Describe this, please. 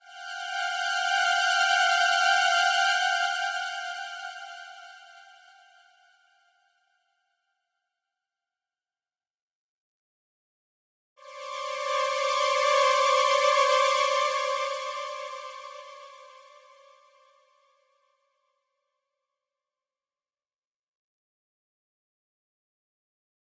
Created in Reason 3. Set to 172bpm.